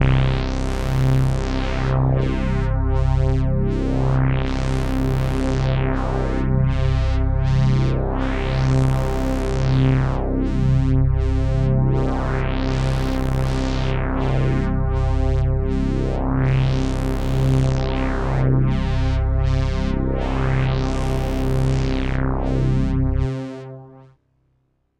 80sretropad80bpm
80's sounding retro-pad, with filtersweep. 8 bars